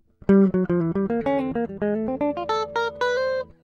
guitar melody 4
jazzy, fusion, apstract, licks, lines, guitar, acid, jazz, groovie, pattern, funk